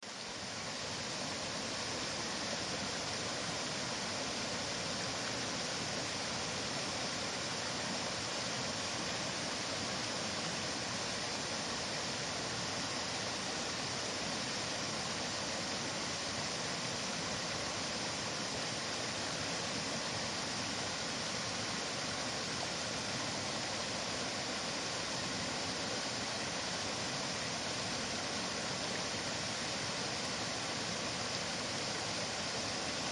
stream+waterfall
Sound of a mountain creek flowing near a waterfall